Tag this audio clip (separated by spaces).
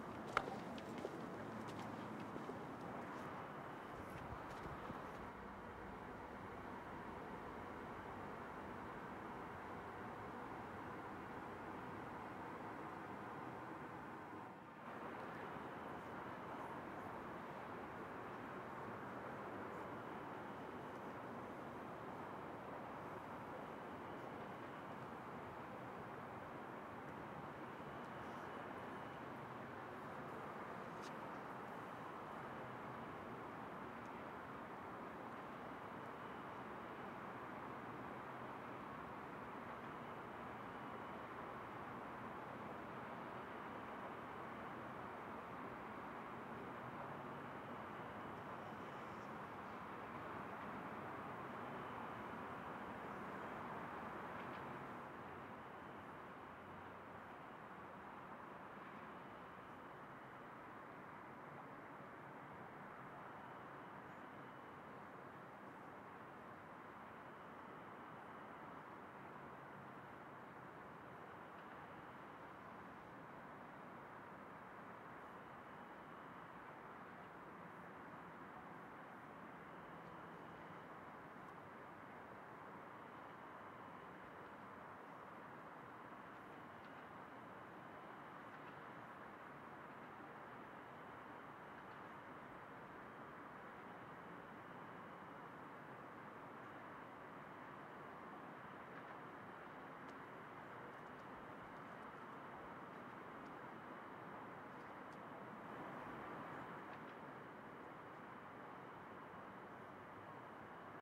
ambience roof traffic field-recording